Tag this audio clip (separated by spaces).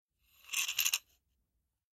Foley metal pully